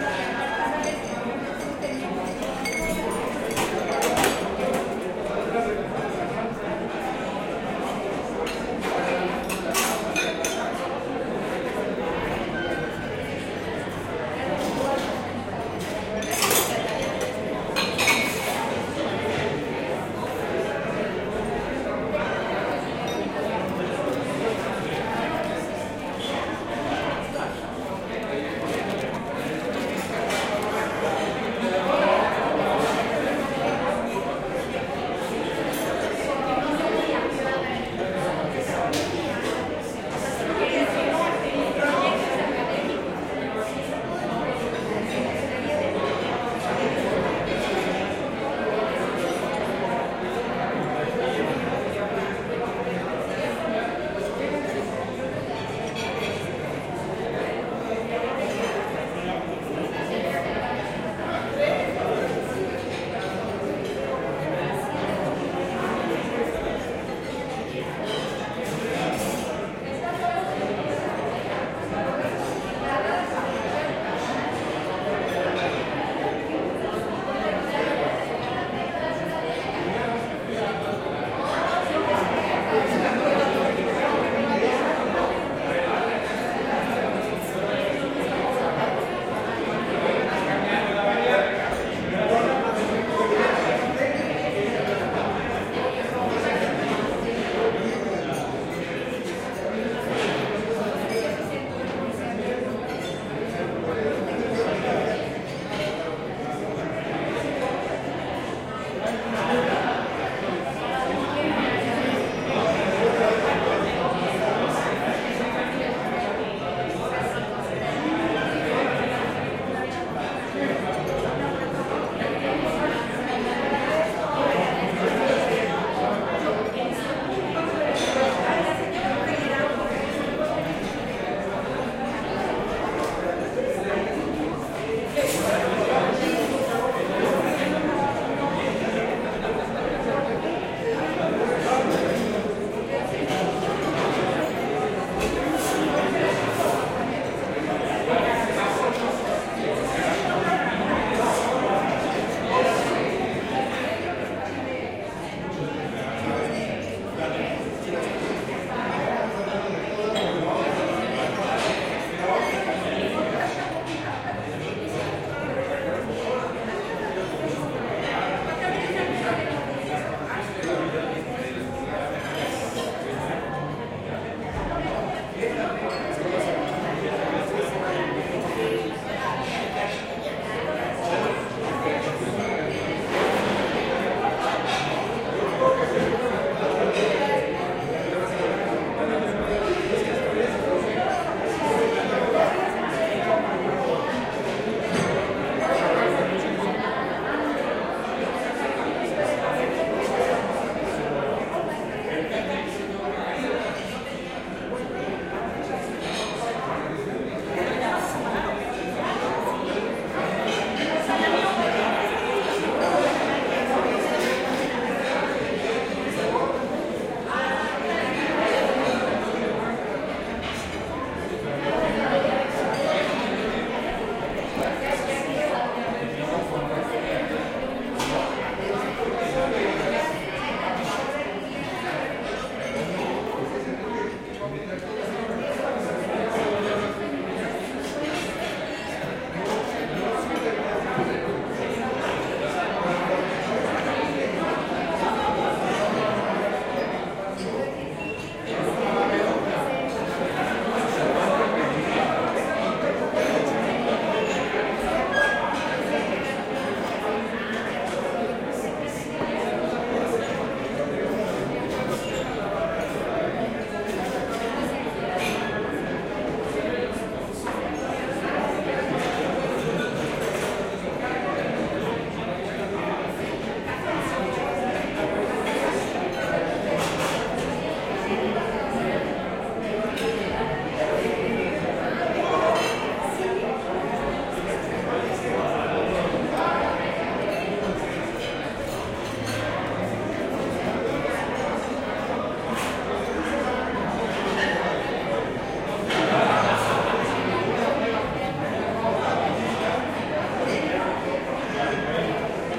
Restaurante MUAC Walla voces cubiertos 3
Este es un ambiente de la cafeteria que se encuentra en el Museo Universitario de Arte Contemporaneo (MUAC), lo grabé con una Zoom H4n que llevaba en mi bolsa aquel día.
This is an atmosphere of the cafeteria that is in the University Museum of Contemporary Art (MUAC), I recorded it with a Zoom H4n that I had in my bag that day.